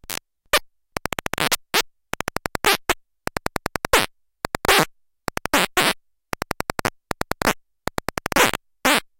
A czech guy named "staney the robot man" who lives in Prague build this little synth. It's completely handmade and consists of a bunch of analog circuitry that when powered creates strange oscillations in current. It's also built into a Seseame Street toy saxophone. This set sounds like a robot talking. If chopped up and sequenced you could do a lot with it.
analog, android, beep, circuit-bent, czech, homemade, machine, prague, robo, robot, speach, squawk, squeek, synth